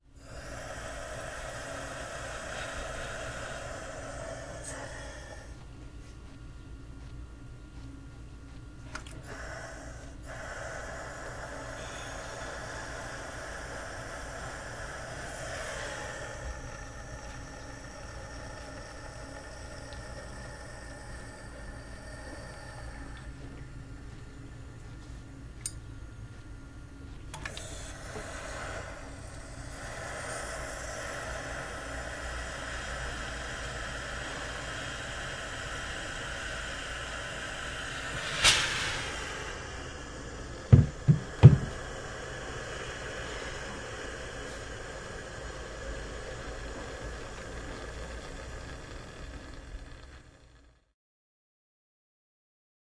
iron - steam jets (3)
Clothing iron, steam jets.
iron, steam, jets